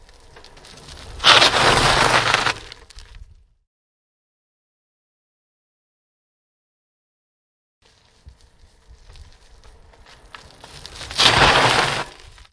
bike brake gravel
Bicycle braking on gravel.
bike, gravel, brake, bicycle, tire